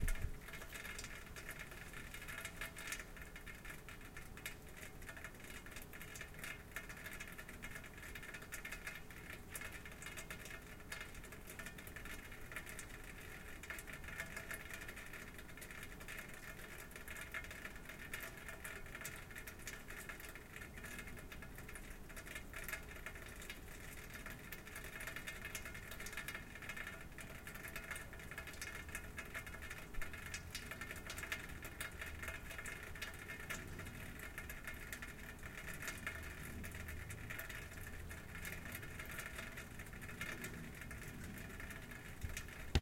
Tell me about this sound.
perfect recording rain dripping, recorded with a zoom 4N in a very silent monastery in Limburg, Holland.